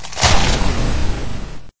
This was cutting lettuce at one point
bass, Loud, low